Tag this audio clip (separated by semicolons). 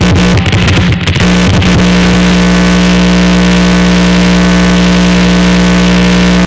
amp; buzz; effect; electric; guitar; note